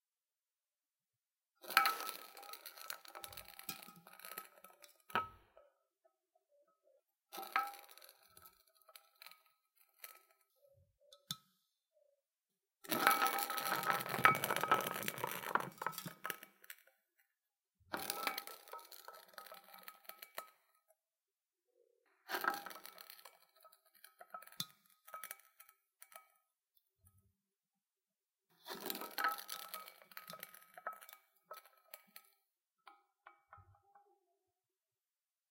Rolling Can
The same can rolling different ways.